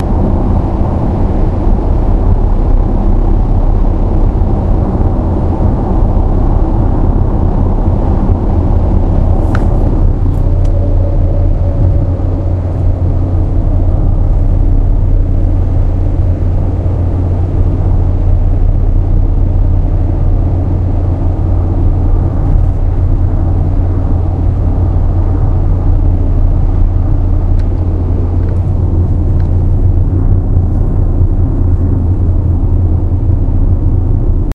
SonyECMDS70PWS digitaldeath2
unprocessed, digital, test, microphone, field-recording